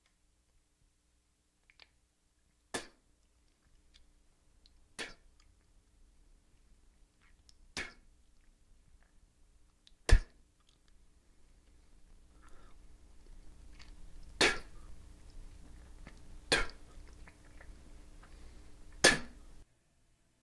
The sound of someone spitting.
spitting, saliva, spit, mouth